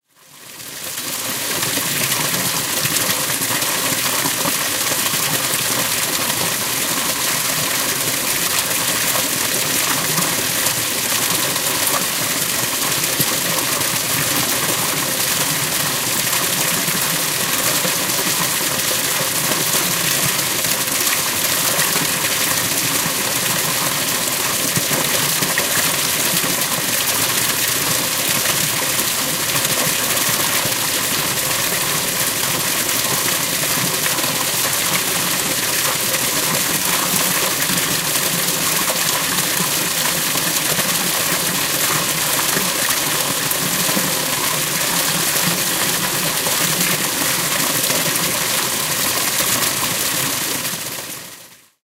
Recorded early September 2016, midnight, Kashiwa, Japan. Equipment: Zoom H2N on MS stereo mode.
close-up, flow, Japan, Kashiwa, put, stereo, stream, streaming, town, village, water